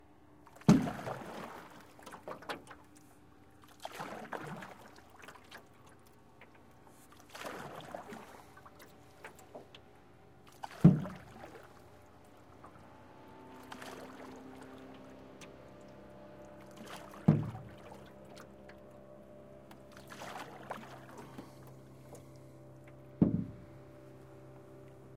Paddle In Rowboat
recorded at Schuyler Lake near Minden, Ontario
recorded on a SONY PCM D50 in XY pattern